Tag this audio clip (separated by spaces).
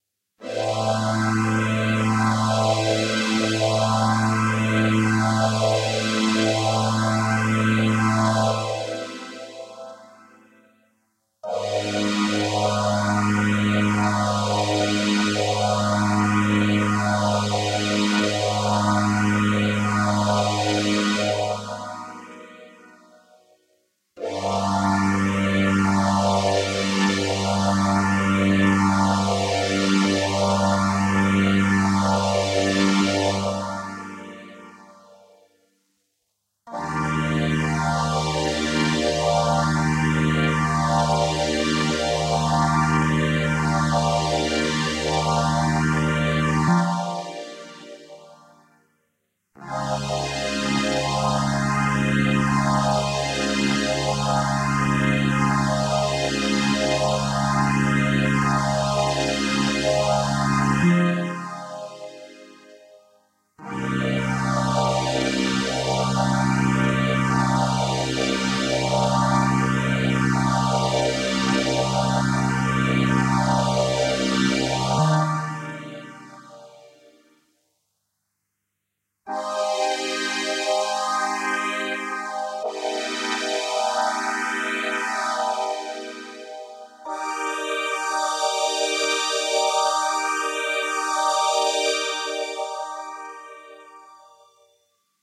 analogue Phaser strings Solina chords